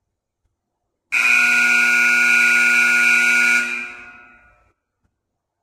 Warble Alarm Mono
Mono recording of an industrial warble alarm in a large concrete room.
Warble alarms are used as warning alerts, to indicate the start of motion in an industrial setting, or on cranes to give notice of overhead loads.
Recording was made using a TSB-165A Alice microphone and a Tascam DR-70D recorder.